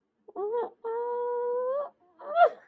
Cactus Butt
Reacting to sitting on a cactus.
Ouch, Spike, Cactus